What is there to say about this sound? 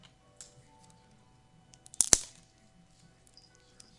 A twig snapped in front of a condenser mic
breaking, snapping, stick, tree, twig, wood